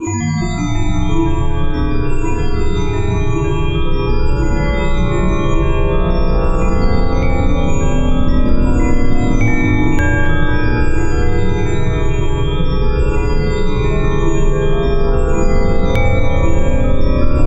A bunch of random notes played on some chimes. I really don't know why I made this, I must have been really bored...
chimes, random, soundscape